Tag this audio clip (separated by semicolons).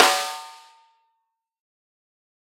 velocity; 1-shot; multisample; drum; snare